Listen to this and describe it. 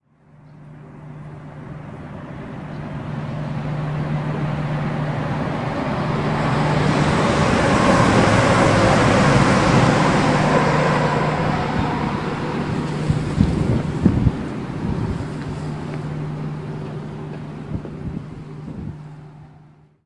Class 220 'Voyager' pass by
Express passenger train passing under a pedestrian bridge. Some wind noise... sorry.